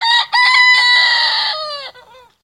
Rooster crowing
My bantam's crow
animal, nature, field-recording, pet, farm, bird